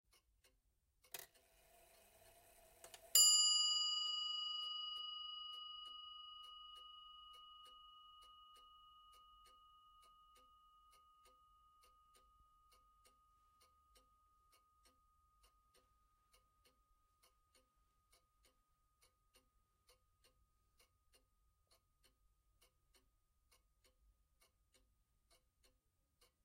Regency chimes 1
A regency clock (made by R Restall, Croydon) chimes once. High pitched.
chimes, regency